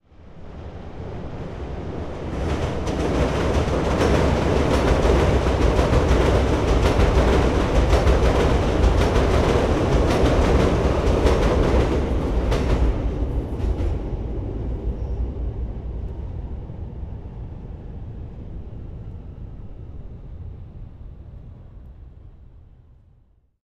CTA RedLine Pass
The CTA (Chicago Transit Authority) elevated "el" Red Line passing north bound after the Addison stop. Recorded with a CAD M179 into a Marantz PMD661 MK II field recorder.
public-transit, field-recording, Chicago